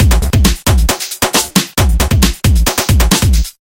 Breaks Energy Beat 01
big beat, dance, funk, breaks
beat, big, breaks, dance, funk